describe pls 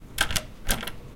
Door Locking
lock, locking